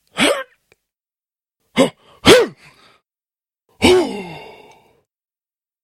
AS016231 Short Exertion
voice of user AS016231
human, male, voice